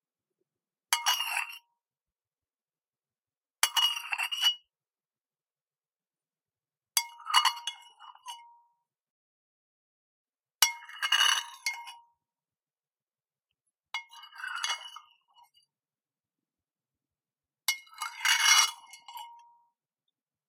Eating soup
eat, soup, spoon